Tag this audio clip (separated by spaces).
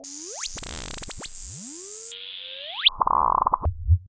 bleep alesis-philtre synth chimera-bc8 bloop